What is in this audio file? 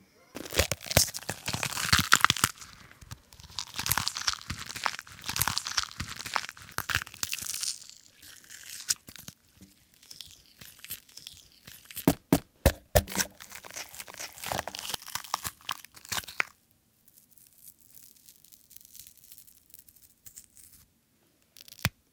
The sound of a body being teared apart and stabbed. This sound was recreated with a paprika.